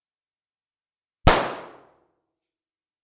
Small pistol gunshot indoors
9mm PAK pistol shot in a small room
shooting, shot, weapon, gunshot, gun, pistol